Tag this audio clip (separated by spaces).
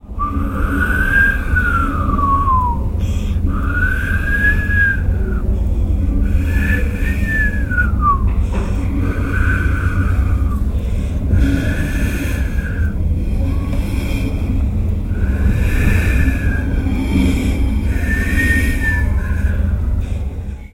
naturaleza sonoros viento